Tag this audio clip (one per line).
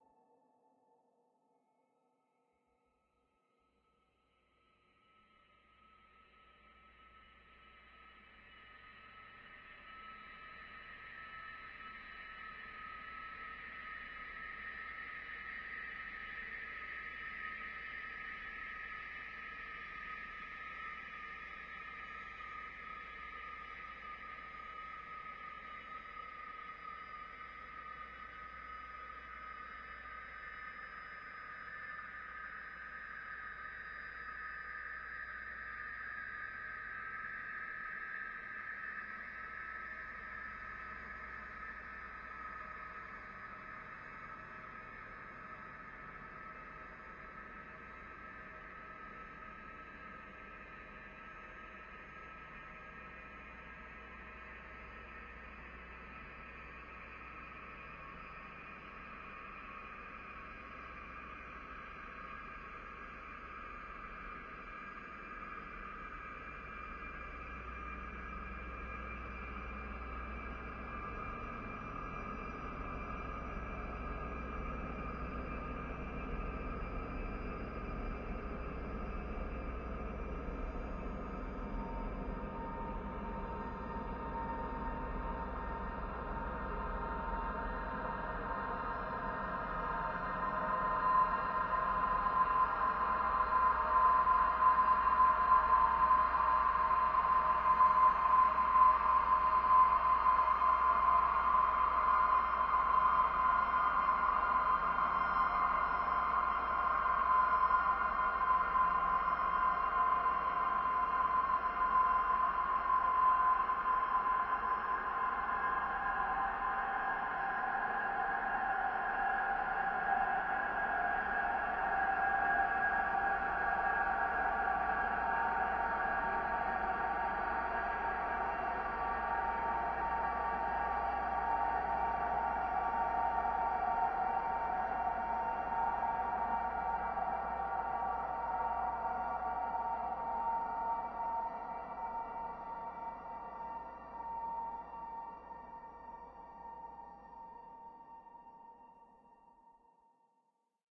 ambient
artificial
drone
evolving
multisample
pad
soundscape